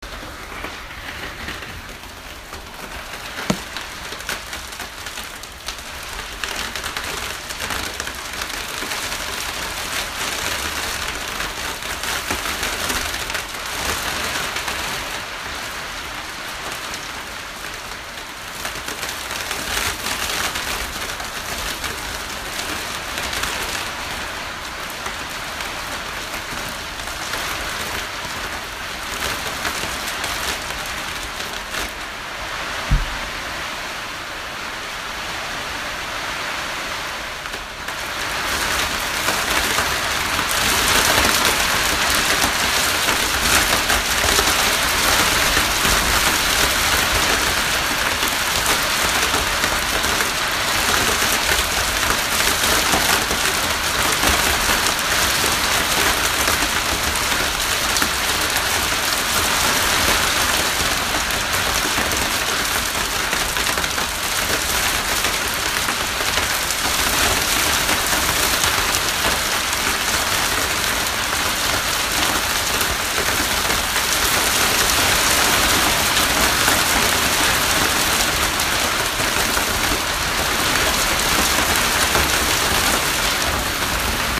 Hail Nepal 3500 m
hail falling in Nepal, recorded from inside of a lodge, recorded on iphone
hail, interior, Nepal